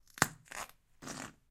Stepping on broken J&B glass bottle with work boots.